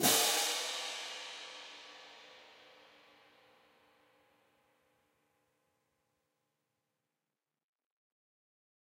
Marching Hand Cymbal Pair Volume 13
This sample is part of a multi-velocity pack recording of a pair of marching hand cymbals clashed together.
band, crash, cymbals, marching, orchestral, percussion, symphonic